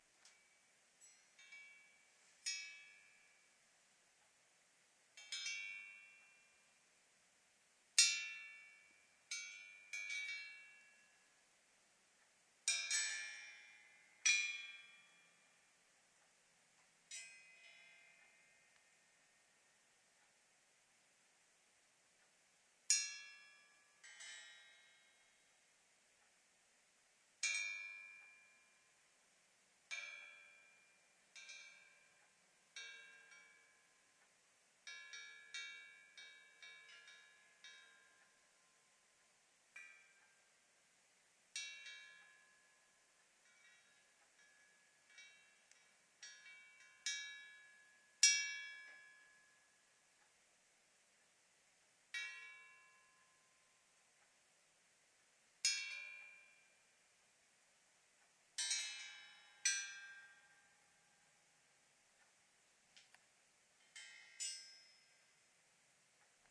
metal chimes 01
sheet-metal Calder sculpture gently chiming against itself
chimes, metal